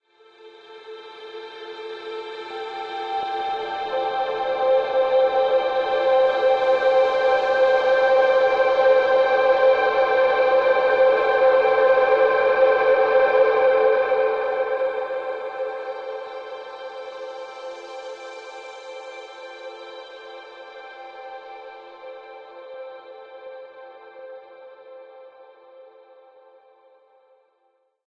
A granular string like sound, growing up and fading away.
reverb, evolve, string, ambient, granular, sound-design